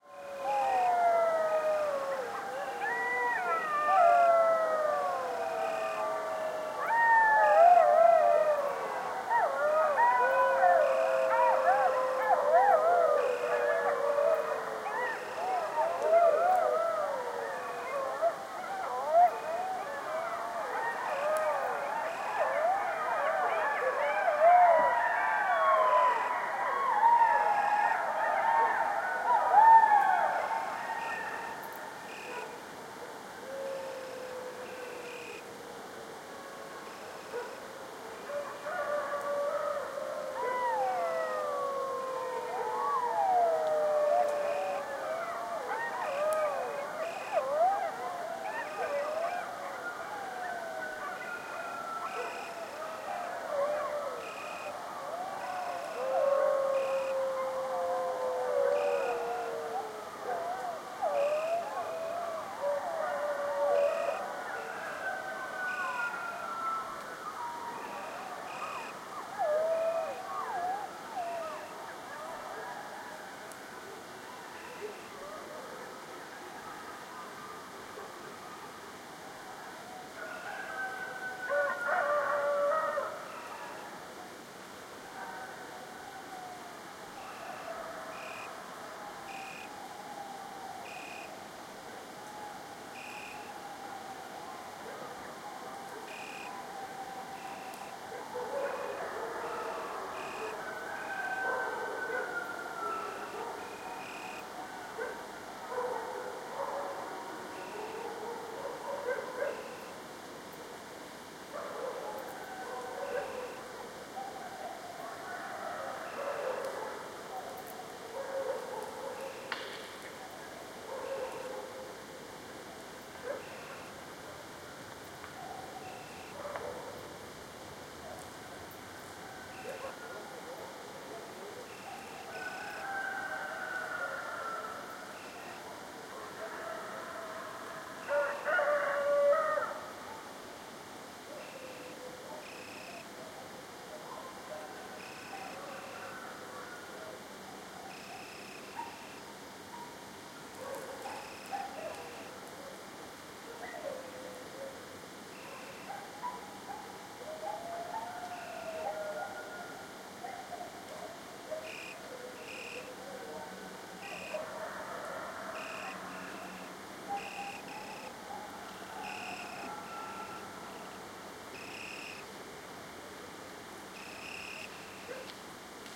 coyote packs howling before dawn breaks up. they hush at the end giving way for rouster sounds and dog barks in a general ambience with crickets.
recorded in a pine forest in Lebanon
recorder with rode ntg4 stereo mic